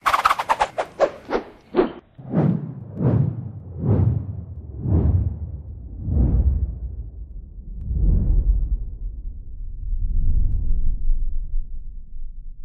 Spinning down
Spinning getting slowed down
slow-down, slow-mo, slowmo, woosh, spin